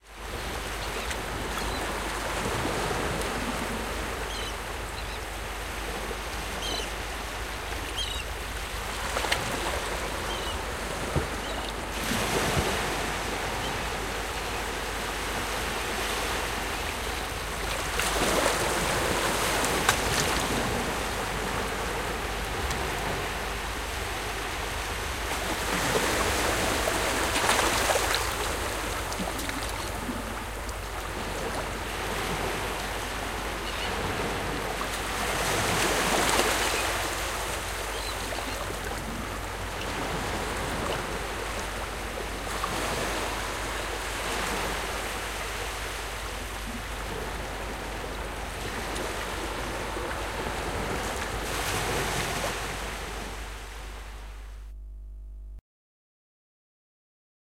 Waves and seagulls
Sea waves medium and a few cries of seagulls swirl, dull roar of the sea beyond.